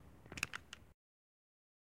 Movimiento Brusco De Arma 4 s
guns, movement